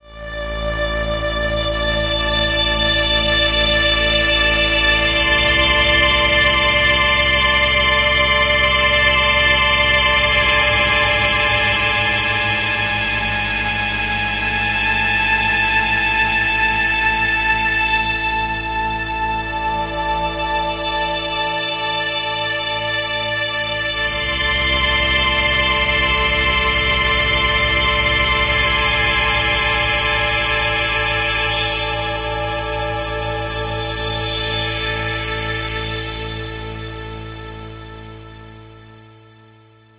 Senatehorrial Halloween 5
Inspired by recent US Senate hearings, here are some scary music fragments just in time for Halloween.
Halloween, cinematic, film, foreboding, haunted, horror, moody, ominous, sinister, suspense